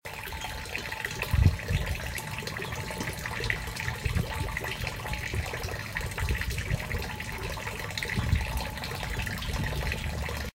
water flowing from a pipe into the sewer
This was water running slowly into the sewer from a pipe. It had a great natural echo.
flow, flowing, fountain, liquid